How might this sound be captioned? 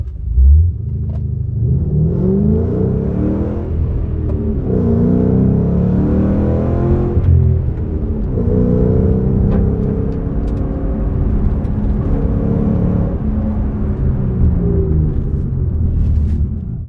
Sound of a Mustang GT500. Recorded on the Roland R4 PRO with Sennheiser MKH60.
stopping, car, fast, GT500, mustang, passing-by, starting, drive, engine, mkh60